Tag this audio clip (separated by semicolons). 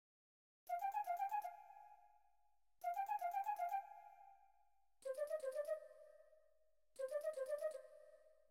sci; fi